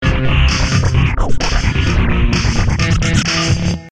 130 bpm, This was generated entirely using FL10 studio version.